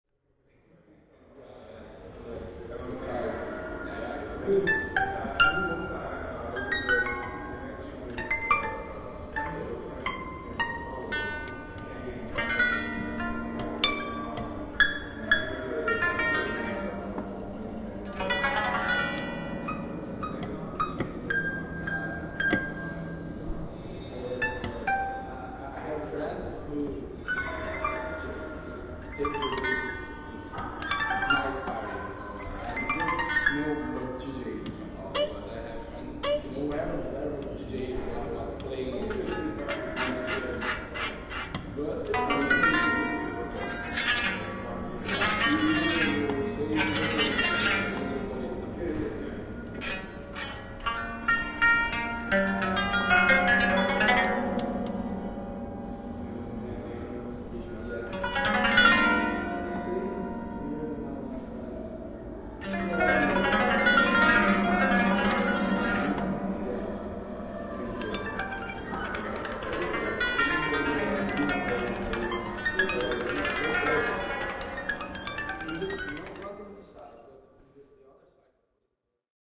Bienal.SP.08.MaM.031
Me Playing a Smetak instrument…remembering some Micus ambiences.
performance, instrument, playing, sound, musical-instruments, field-recording, museum, ambience